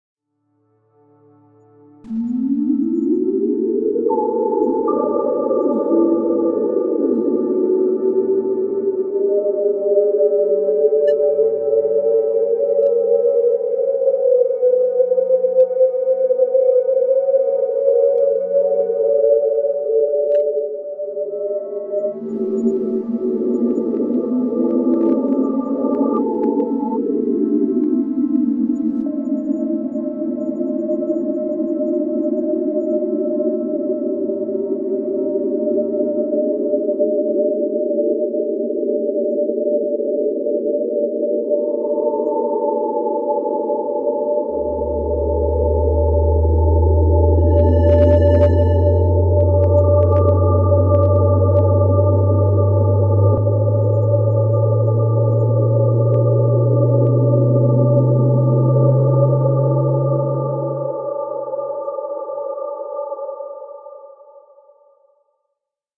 Spacey UFO sounds made with sin waves and synths and ablleton live.....
UFO, Fiction, Sci, Science, Space, FI, Scary